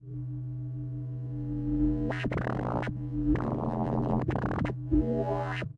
sound of my yamaha CS40M analogue
sound, fx, synthesiser, sample